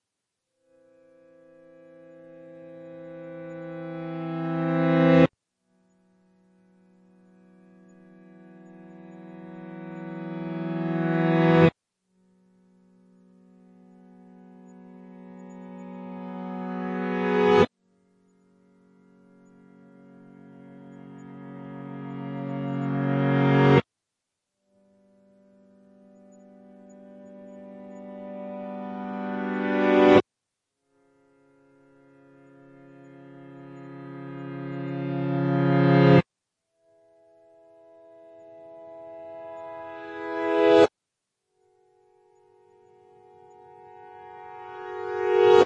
Piano suspenses
Playing random tunes but reversed them to make it sound like nice suspense, Sounds processed with Synthesia and VirtaulMIDISynth, Recorded in Audacity.